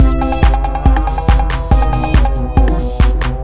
A 2 measure loop made at 140 bpm.
A simple beat with 4 separate synth brass parts. An experiment in pitch bending.
drums, drum-loop, wah, synth, pitch-bending, slider